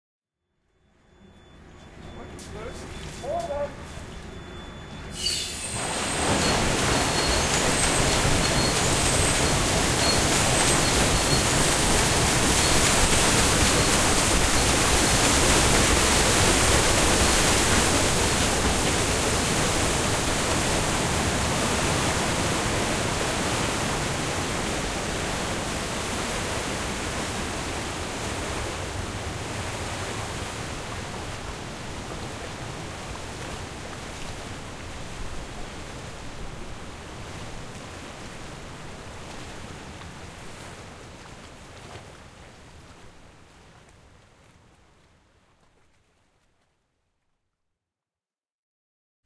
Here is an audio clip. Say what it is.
Paddle Steamer Ship taking off (lake of zurich, switzerland)
boat, engine, paddle, sea, ship, steamer, water, wheel